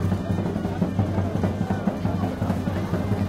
Marrakesh ShortLoop
African music recorded in Marrakesh.
Gear: Sony PCM D-50